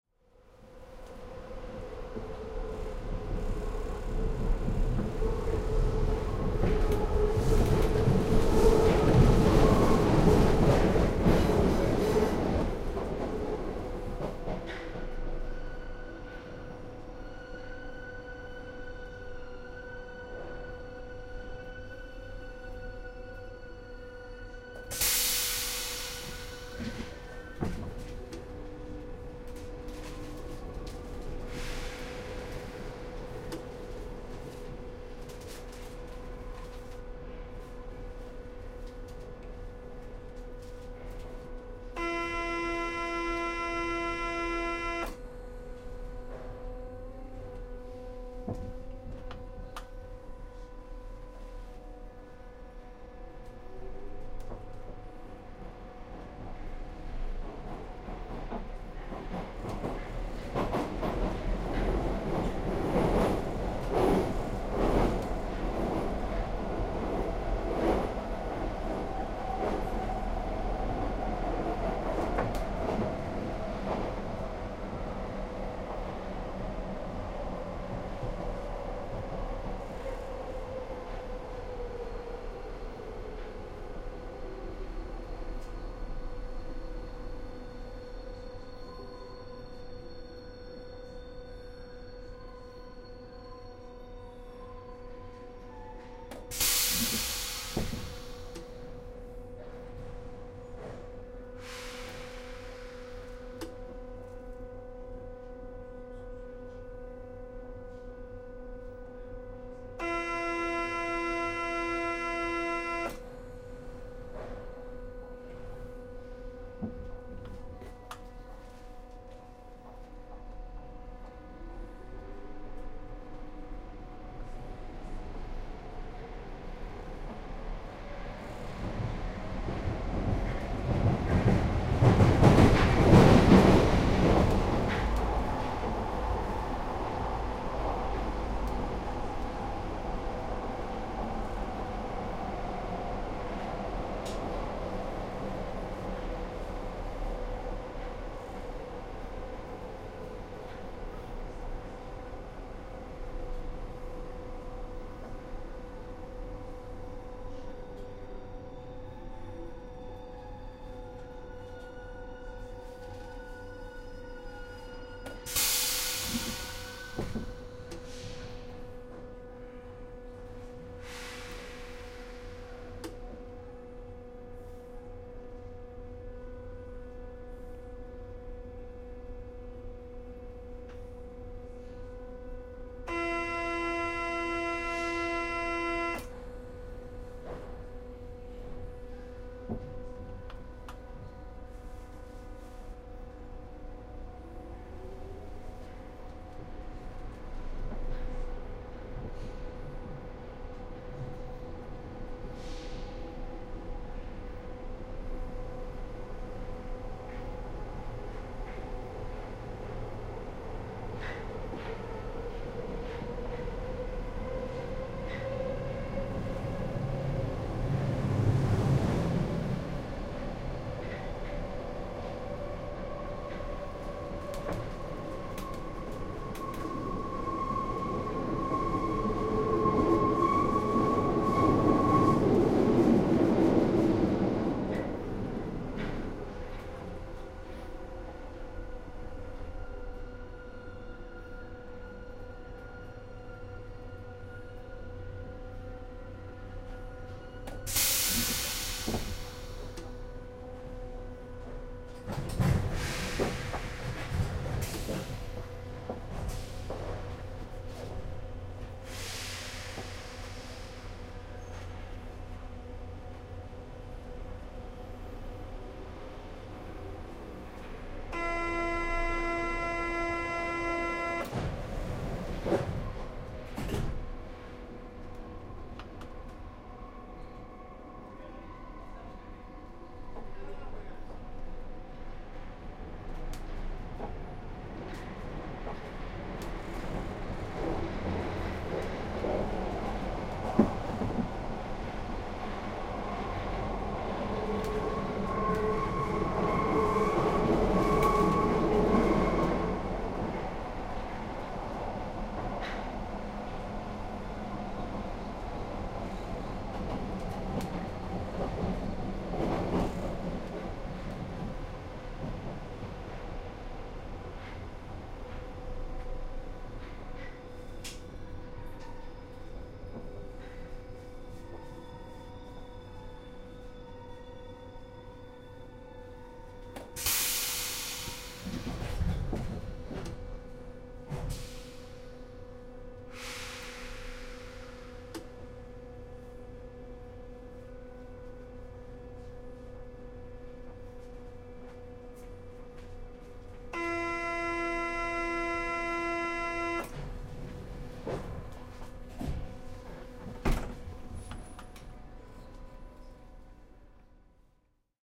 Paris Metro 001 Front
This pack contains recordings that were taken as part of a large project. Part of this involved creating surround sound tracks for diffusion in large autidoria. There was originally no budget to purchase full 5.1 recording gear and, as a result, I improvised with a pair of Sony PCM D50 portable recorders. The recordings come as two stereo files, labelled "Front" and "Rear". They are (in theory) synchronised to one another. This recording was taken in the Paris Metro (Underground).
walking, Field-recording, doors-opening, metal-wheels, trains, doors-closing, people, metro, buzzer, underground, Paris, alarm